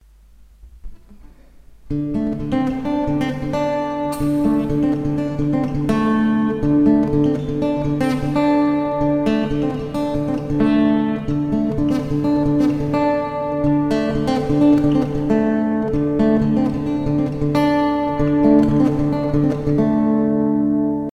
D major country tune.